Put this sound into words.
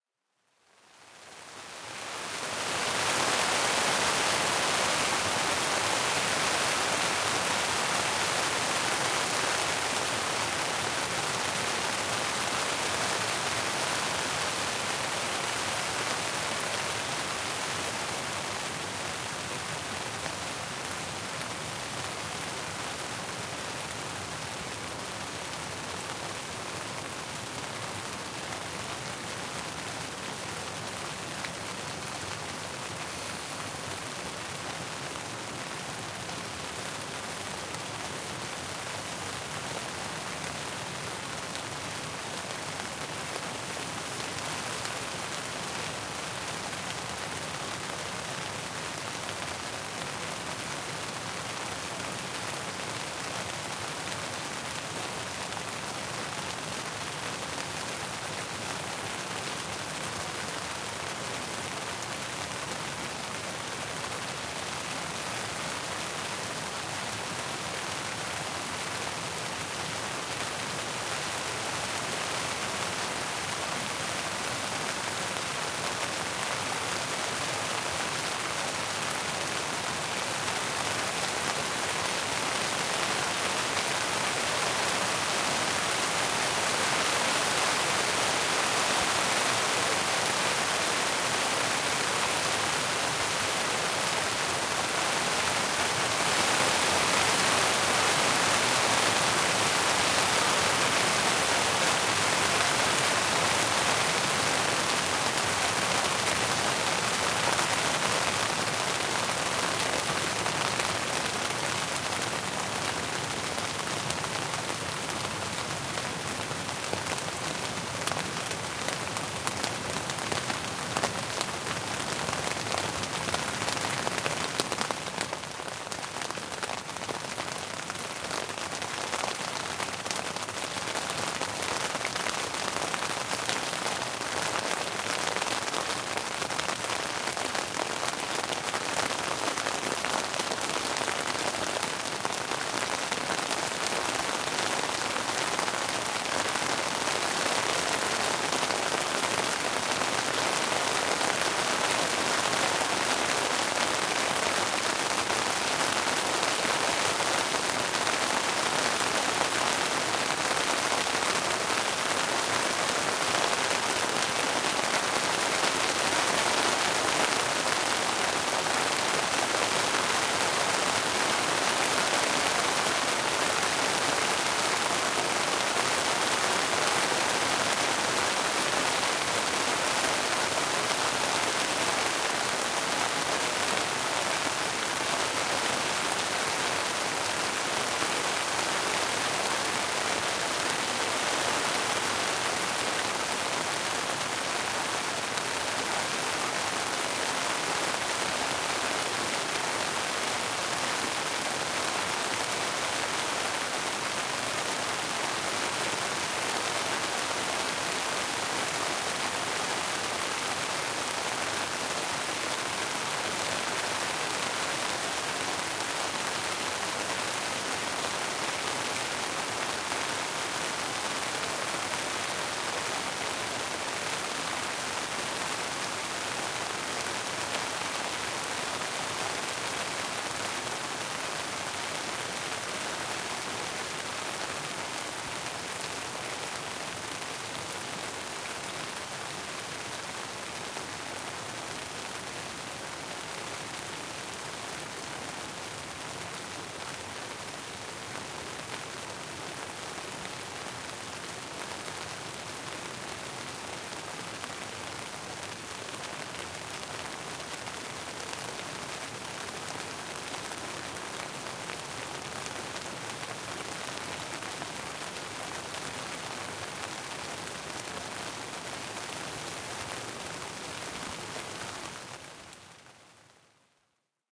Trapped in the tent during some inclement weather. Lots of changes in rain intensity / drop size.
storm, water, rain, weather, camping